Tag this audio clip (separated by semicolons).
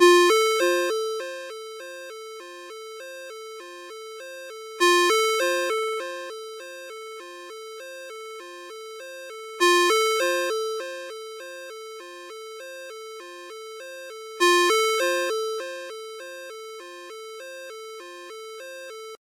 cell; mojomills; alarm; ring-tone; arp4; phone; ringtone; cell-phone